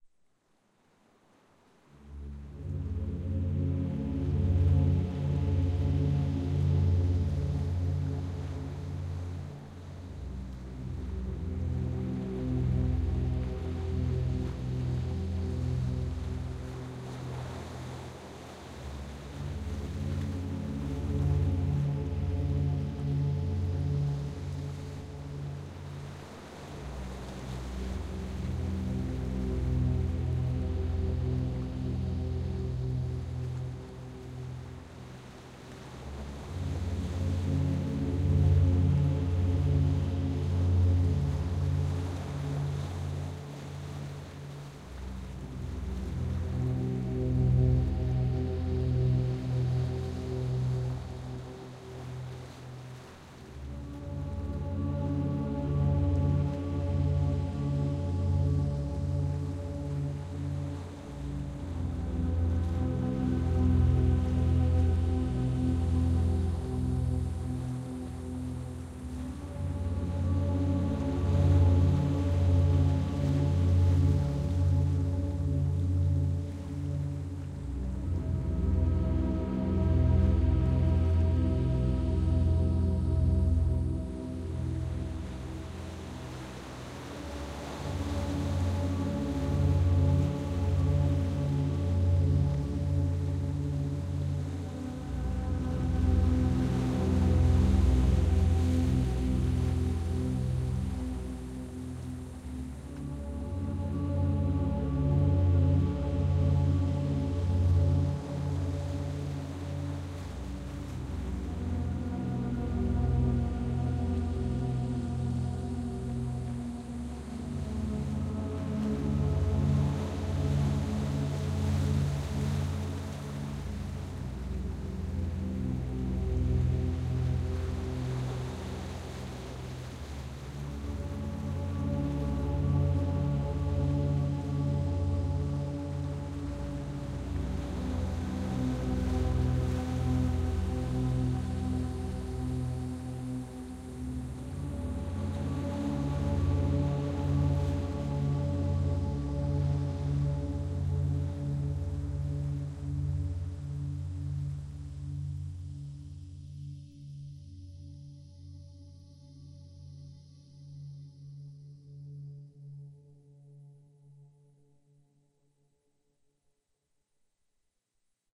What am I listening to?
relaxation music #53
Relaxation Music for multiple purposes created by using a synthesizer and recorded with Magix studio. Edited with Audacity.
Friendly reminder: This sound IS attributional. If you don't know what that means you should check the link under the download button.
(Created for a request)
I used sounds that aren't mine: